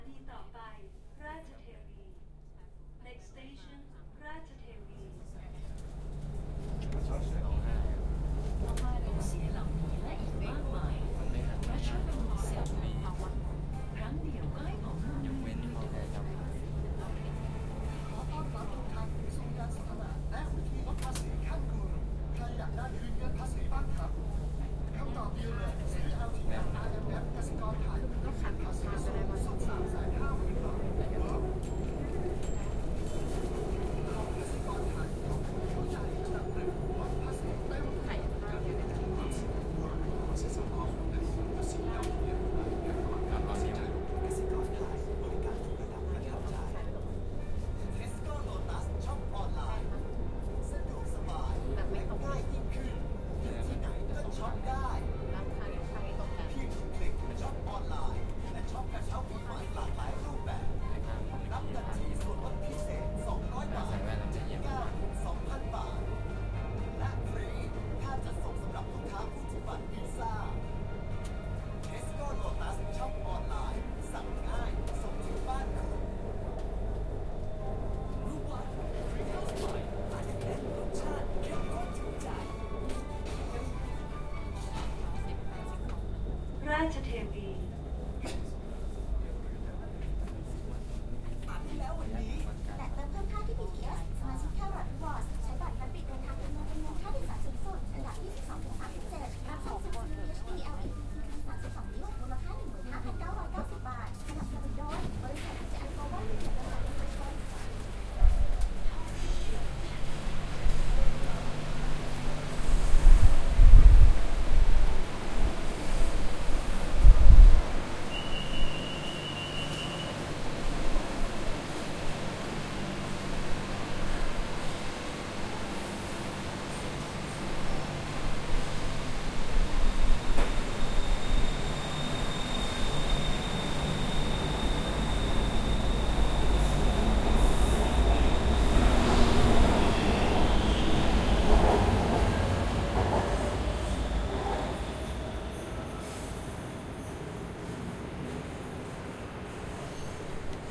Bangkok Skytrain BTS
Recording taken inside a Bangkok Skytrain during the day.
Thailand, BTS, Skytrain, Train